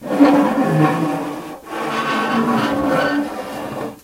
Metal Drag Short
Metal, scraping, dragging across metal
across, scraping